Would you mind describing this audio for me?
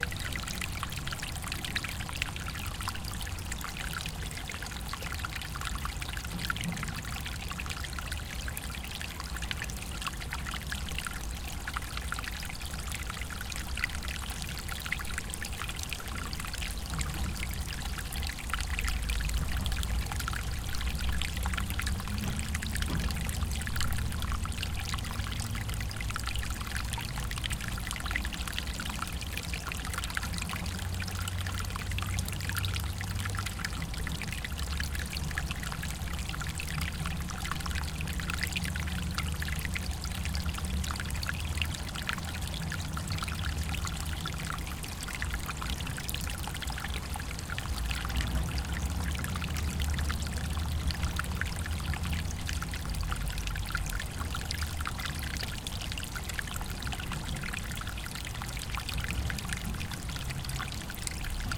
water flow roll 1
Sound of water flow on roll. This water flows from wastewater tube the riverside near Leningradsky bridge.
Recorded: 2012-10-13.
city, noise, wastewater, water, water-flow, water-roll